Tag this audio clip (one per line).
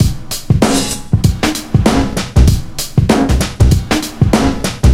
beats
percussion-loop
loops
drumloop
drum
drum-loop
loop
drums
quantized
drumloops
beat